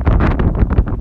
wind windy storm